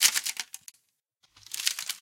delphis PLASTIC CRUNCH LOOP 08 #120
bpm120, plastic, crunch, loop